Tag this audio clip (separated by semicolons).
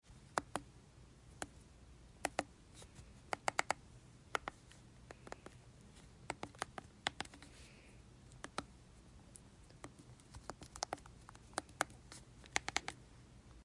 buttons; remote; roku; tv